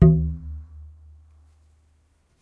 Nagra ARES BB+ & 2 Schoeps CMC 5U 2011
a grand wood baton hit with hand
drumstick, harmonics, percussion, pulse, resonance, wood